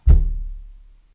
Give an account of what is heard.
Palm strike on the side of a closed wooden door.